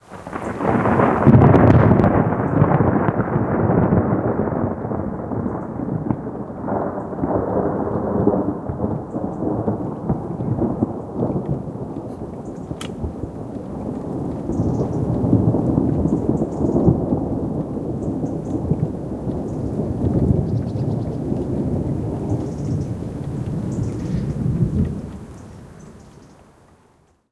One of the close strikes from a storm on the 29th of July 2013 in Northern Ireland. Recorded with a Rode Stereo Videomic pro.
Lightning, Storm, Thunder, Thunderstorm, Weather